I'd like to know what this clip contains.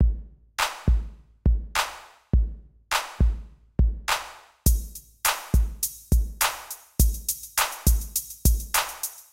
TR-808 loop
sample used in a trap music